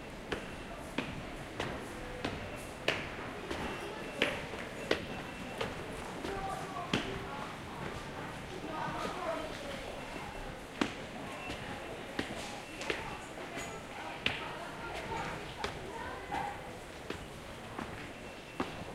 808 Kings Cross steps
Footsteps in Kings Cross underground station.
underground, london, field-recording, tube, footsteps, london-underground